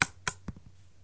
pedra curt
basically, this is the recording of a little stone falling on the floor, faster or slower, depending on the recording.